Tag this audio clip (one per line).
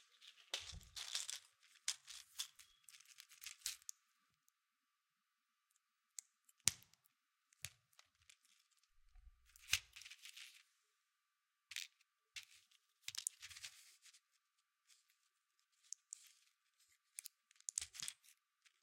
pill
plastic
medicine